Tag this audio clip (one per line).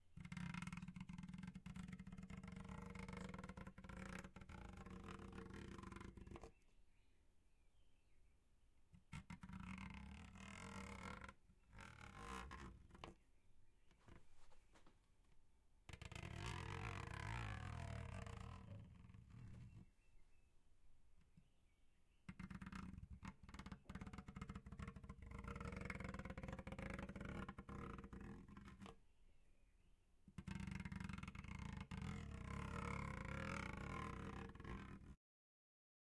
Eerie
Harsh
Metal
OWI
Scratch